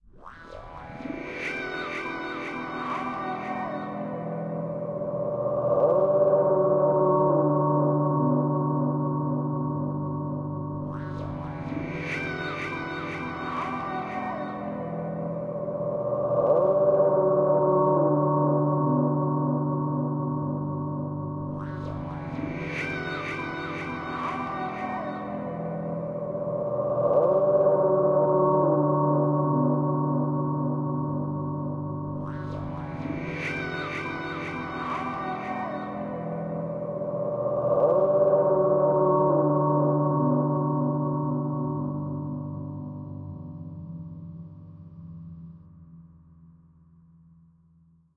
Passing Clouds (wind)
This is a synthetic sample replayed in several octaves, run through at least 10 filters and sends and replayed several times to get a complete effect.